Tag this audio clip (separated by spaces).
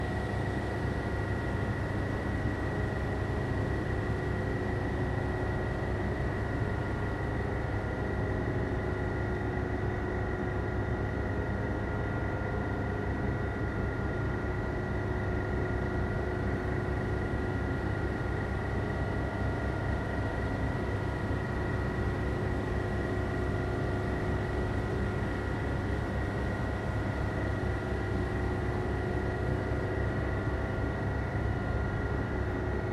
ambiance ambience ambient atmo atmos atmosphere background background-sound general-noise soundscape white-noise